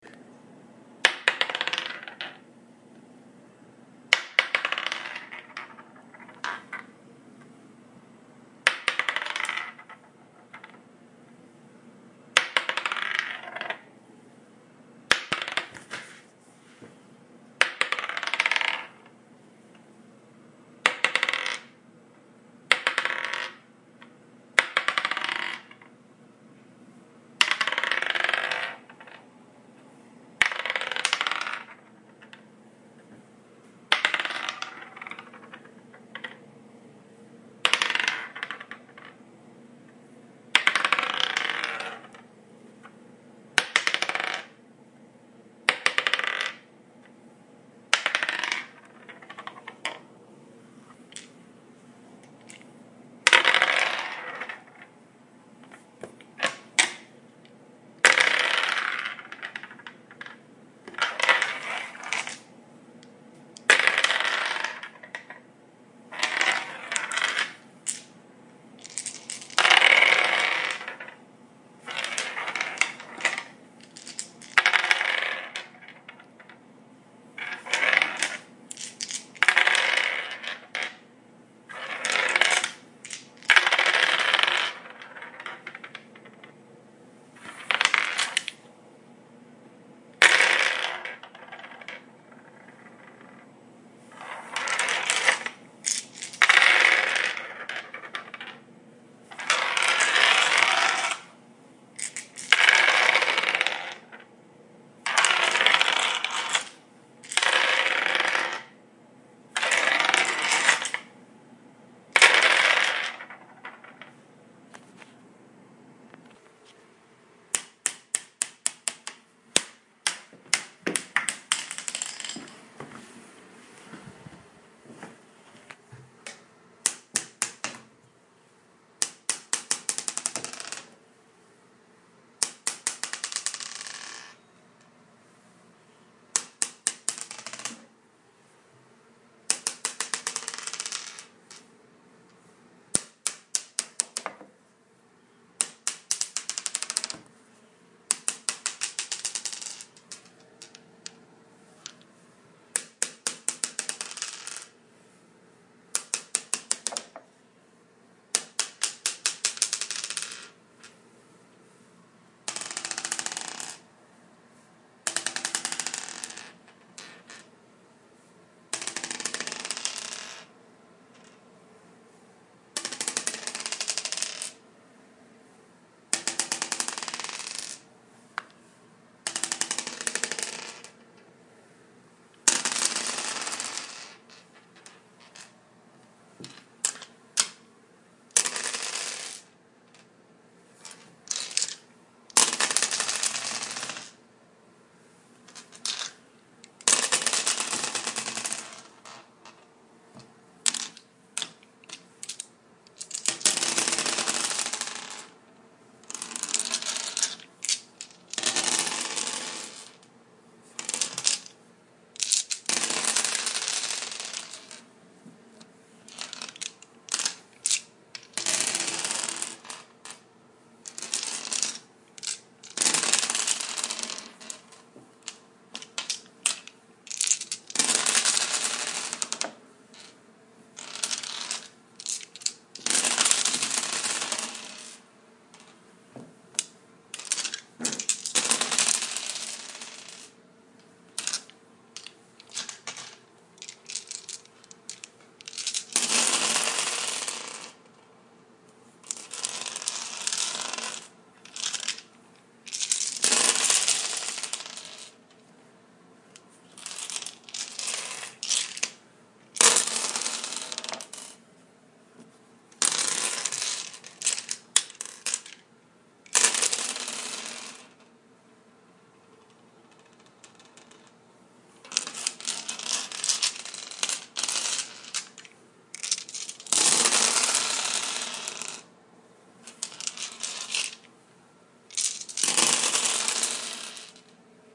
This file contains the sounds of d12's rolling on various surfaces and which are not included in the other files.
Dice rolling sounds. Number of dice: 1, 5, and 10+ samples. Type of dice: d2 (coin), d4, d6, d8, d10, d12, d20, d100 (two d10's). Rolling surfaces: wood, tile, and glass.